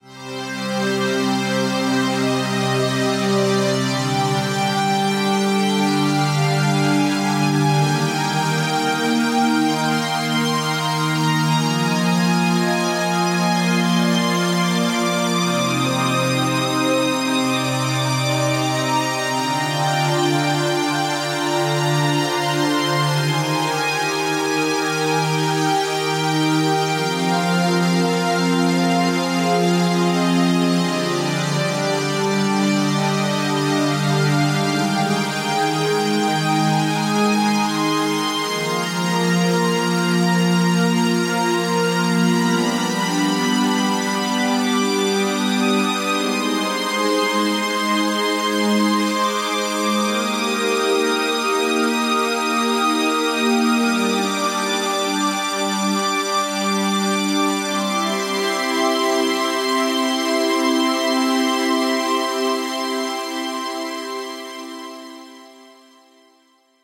Civil Destruction 2(No FX)
beat, drumloop, melody, pad, phase, progression, sequence, strings, synth, techno, trance